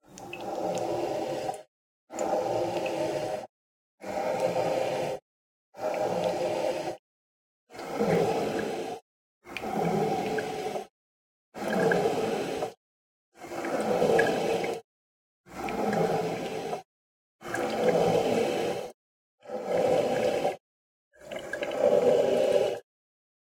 coffeemaker breathing
Raw recording of weird "breathing" sounds made by my coffeemaker.
mic: Rode NTG3
recorder: Roland R26